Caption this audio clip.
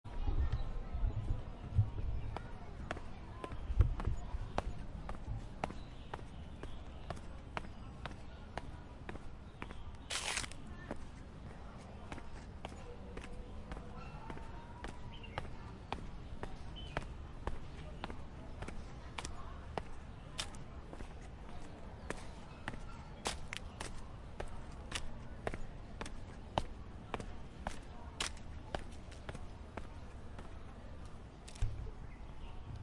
Going down a staircase in a park in the afternoon
This is recorded when I went out from a park using a staircase. There were some leaves on one of the steps.
staircase, birds, spring, park-in-HK, afternoon, nature